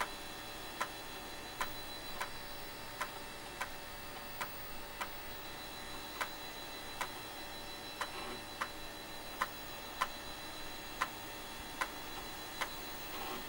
reading floppy disc 3
reading/loading sound of the Floppy drive (version 3)
floppy, loading, disc, floppydrive, floppydisc